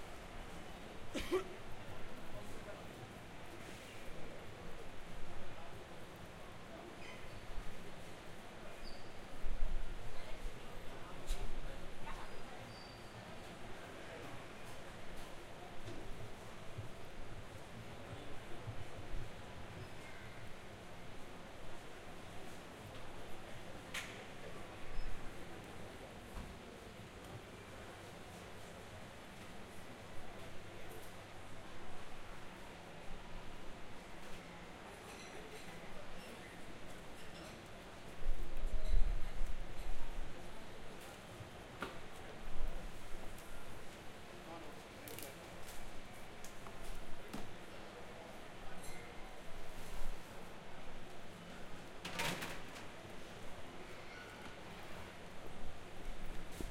Ambience INT airport waiting hall gate 2 (lisbon portugal)
Field Recording done with my Zoom H4n with its internal mics.
Created in 2017.
2, airport, Ambience, gate, hall, INT, lisbon, portugal, waiting